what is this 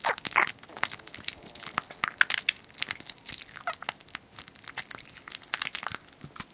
sticky textures (alien new born)
Some weird sticky noises made with my mouth.